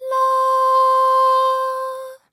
KT LA C4
Warming up. LA! C4. Recording chain Rode NT1-A (mic) - Sound Devices MixPre (preamp) - Audigy X-FI (A/D).